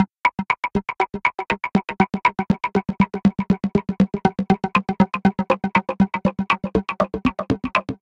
Random fm loop - 120bpm
Random FM loop running at 120 bpm (and playing a G key), produced using one of my own designed patches, made in Sonar X3 using Ichiro Toda's Synth1 VSTi.